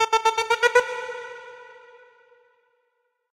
An effected violin.
violin, fx